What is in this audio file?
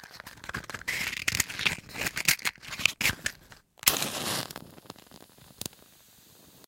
match light 1
a match liting sound
birn, flame, match